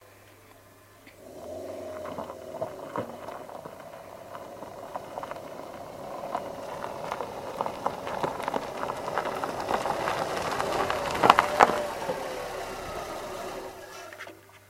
Car on gravel
Car that drives on a gravelroad.
car, road